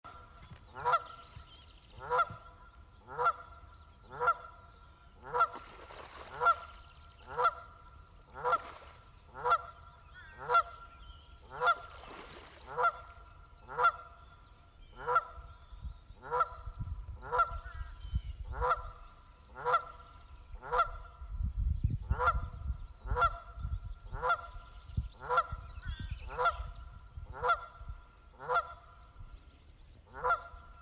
(Raw) Goose
A raw recording of a goose honking. No background noise has been filtered out.
birds, field-recording, goose, nature, raw